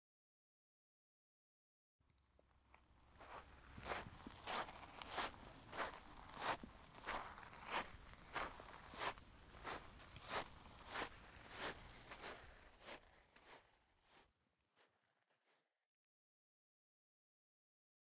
Walk - Pebbles
Walking on pebbles
CZ, Czech, Panska